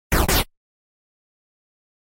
A retro reload video game sound effect.
reload, video, retro, game